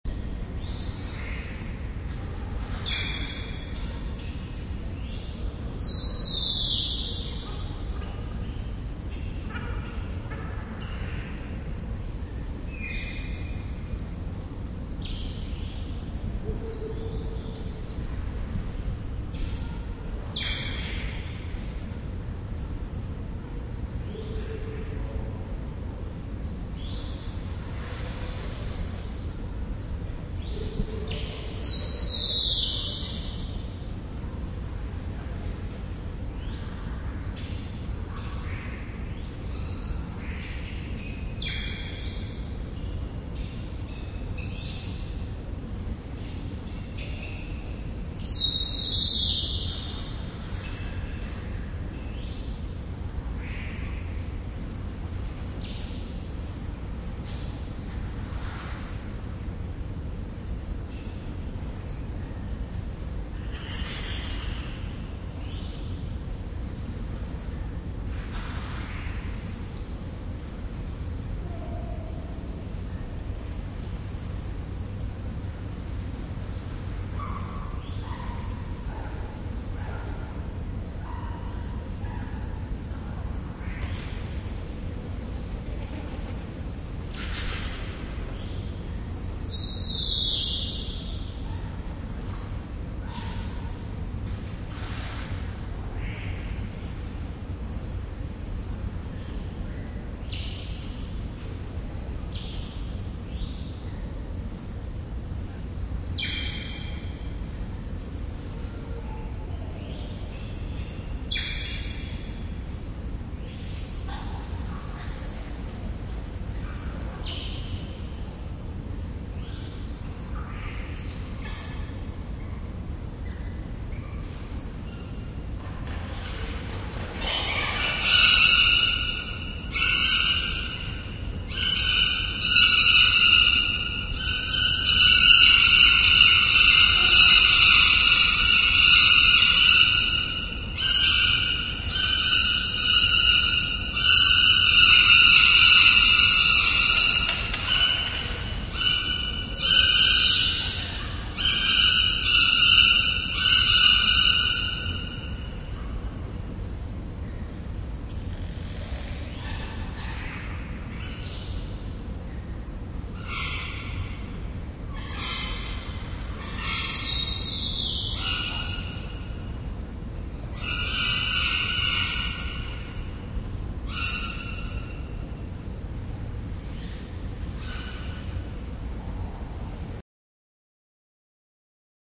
JK Aviary
Recording at an aviary. Mild/Medium aviary action for first 2/3 of the recording. Moderate aviary action during last 1/3.
aviary, ambiance, birds